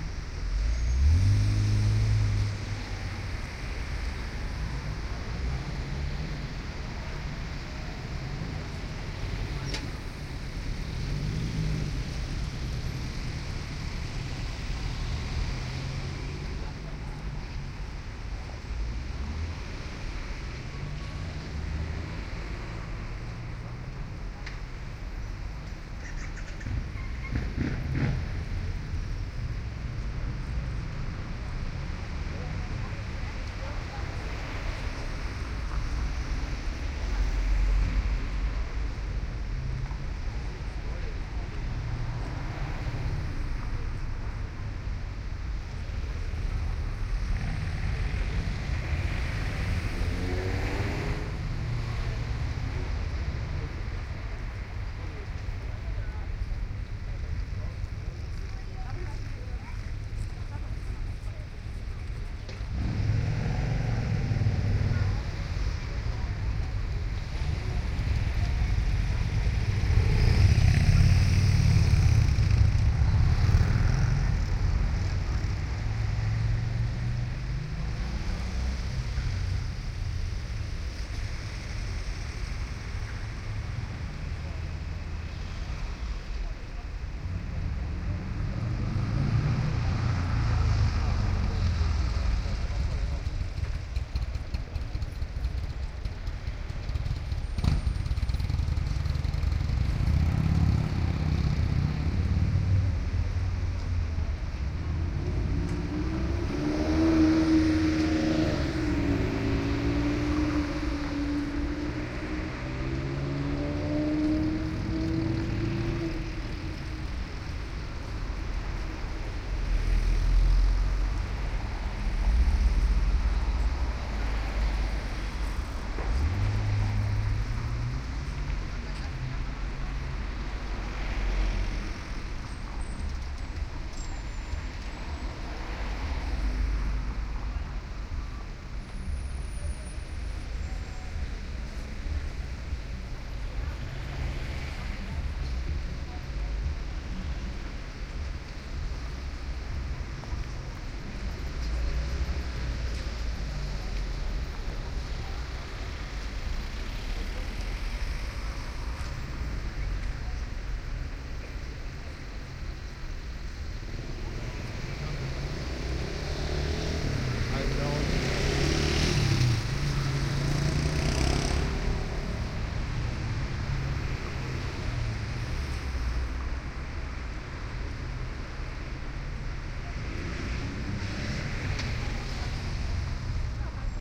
A few minutes of traffic noises, like cars, motorbikes and people walking by, recorded at a busy street in Hanover / Germany. OKM binaural microphones with a 3 adapter into iriver ihp-120.
binaural, cars, city, field-recording, motorbike, people, town, traffic